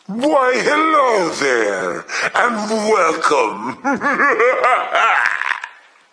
Funny evil cartoon voice with laugh
Short welcoming by evil cartoon character plus laugh.
Halloween, cartoon, character, funny, goofy, human, silly, voice